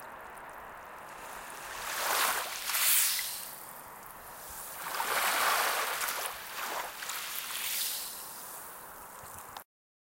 Lake Superior Waves
This recording is of wave ripples hitting the sand on Lake Superior.
This was recorded with a Zoom Hrn Pro Handy Recorder. It was edited in Cubase Le 10 focusing on compression and normalization. The mic used was the built-in mic configuration on the unit positioned on a mini tripod 8 inches above the sand.
field-recording, lake